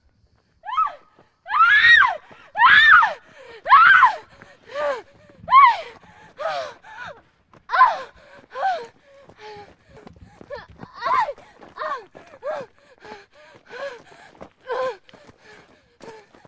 woman screaming of fear
A very loud screaming of a woman being followed, pretty angry and scared , recorded with a cs3e Sanken
yelling, female, shout, scared, followed, running, pain, horror, woman, scary, girl, fright, screaming, scream, fear